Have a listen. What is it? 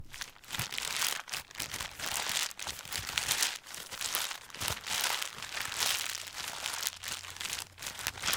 Leaves Crunching
Leaves being crunched on ground.
Walking, Leaves